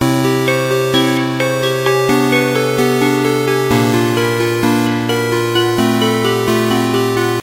BAS-21022014 - Game Loop 6
Game Loops 1
You may use these loops freely if
you think they're usefull.
I made them in Nanostudio with the Eden's synths
(Loops also are very easy to make in nanostudio (=Freeware!))
I edited the mixdown afterwards with oceanaudio,
;normalise effect for maximum DB.
If you want to use them for any production or whatever
23-02-2014